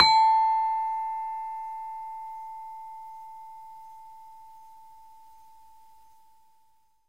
Sample of a saron key from an iron gamelan. Basic mic, some compression. The note is pelog 5, approximately an 'A'
pelog, saron, gamelan